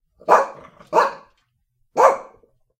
A small dog barking multiple times.
animal, barking, dog, small-dog, woof